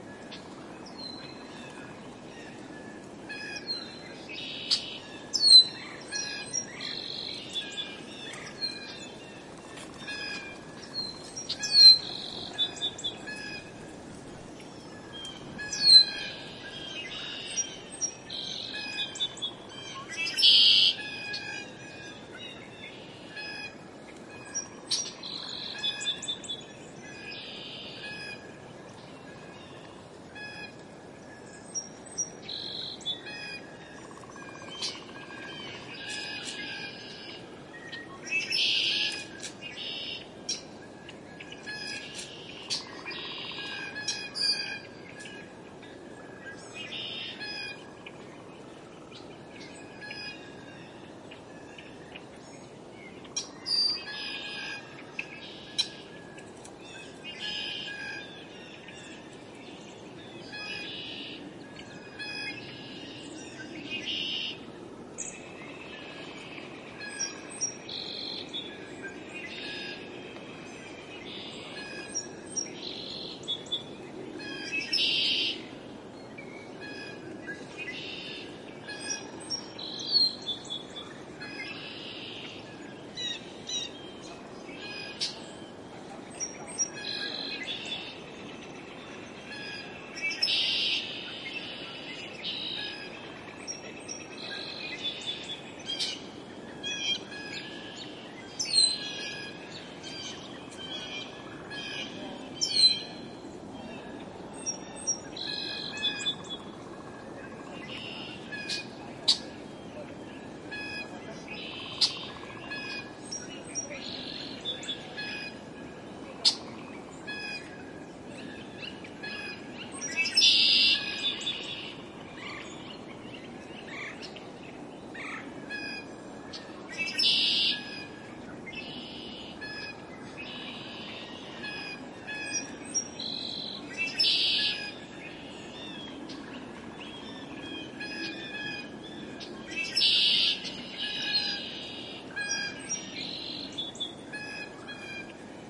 Recorded at the side of a lake on easter 2023. You can hear the wind rustling through the trees. Some background noises are audible, including passersby and what sounds like a camera shutter. Birds heard: Blue Jay, Red-winged Blackbird, Song Sparrow, Northern Flicker, Red-Bellied Woodpecker, Northern Cardinal, American Robin, Blue-Grey Gnatcatcher.
Primo EM172 Mic Capsules -> Zoom XYH-5 X/Y Mic Attachment Line In -> Zoom F1 Audio Recorder -> Low-Cut Filter